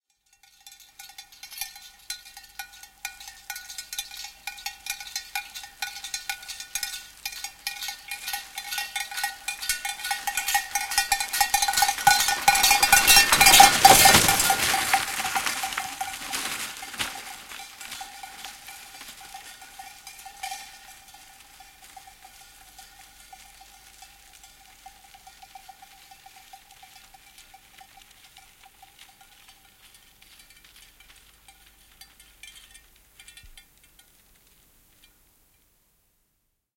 Pororeki ja kello, ohi / Reindeer with a sledge, sleigh-bell, passing by galloping in a frost with the bell clanging, big freeze
Poro ja reki ohi laukaten kovassa pakkasessa kello soiden.
Paikka/Place: Suomi / Finland / Inari, Lisma
Aika/Date: 12.01.1977
Aisakello, Christmas, Field-Recording, Finland, Finnish-Broadcasting-Company, Freeze, Frost, Gallop, Joulu, Lapland, Lappi, Laukka, Lumi, Pakkanen, Poro, Pororeki, Reindeer, Reki, Sledge, Sleigh-Bell, Snow, Soundfx, Suomi, Talvi, Tehosteet, Winter, Yle, Yleisradio